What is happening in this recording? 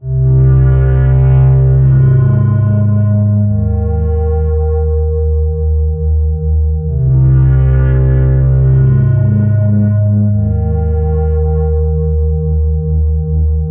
Original un-synthesized Bass-Middle
un-synthezised bassline.
bassline, effects, original, sample